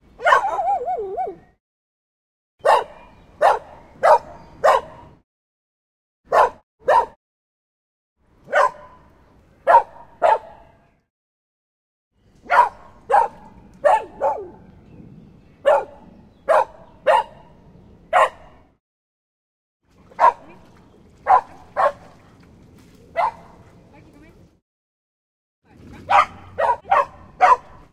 A cute and friendly dog barking while playing in the water with another, bigger, quieter doggie in the park.
Recorded with Zoom H2. Edited with Audacity.